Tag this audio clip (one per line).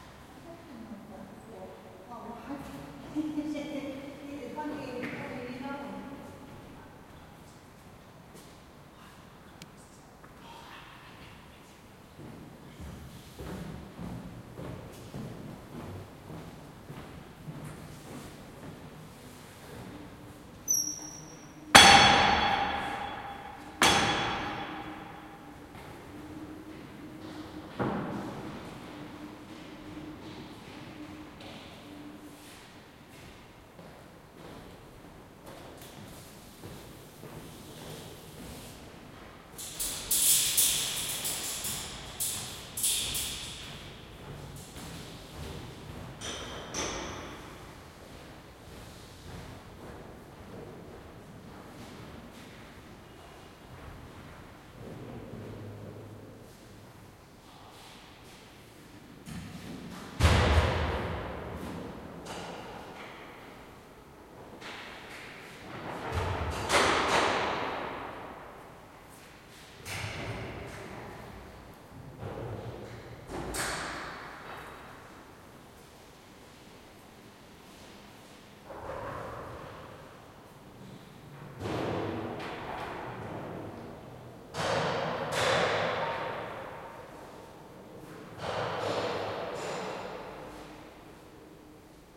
Surround
Doors
ATMOS
Jail